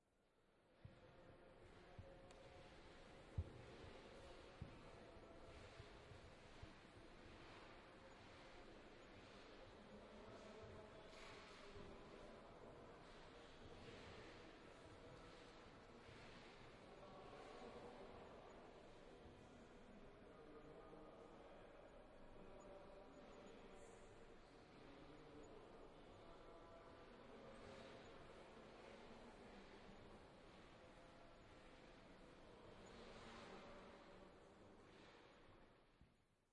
silencio com movimento exposicao serralves
Silence on an exposition, with some movement.
this recording was made with a zoon h2 and a binaural microphone in Fundação de Serralves on Oporto